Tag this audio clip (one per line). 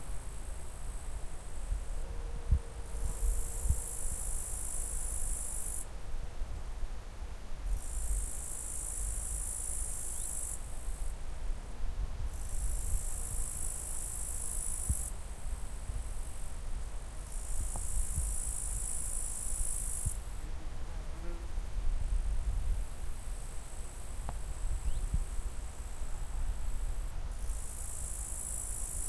area
farmland
field
fields
general-noise
grazing
open
september